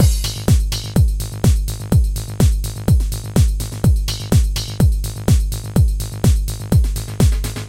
Sicily House Fill-in
Sicily House Beats is my new loop pack Featuring House-Like beats and bass. A nice Four on the Floor dance party style. Thanks! ENJOY!
dance, chilled-house, beat, italy, bassy, four-on-the-floor, house, 125bpm